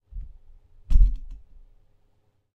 A low-frequency bump picked up with the microphone from moving the stand of a condenser microphone.
Microphone: Rode NT1000
Preamp: ART DPSII
Soundcard: RME Hammerfall Multiface
condenser, move, microphone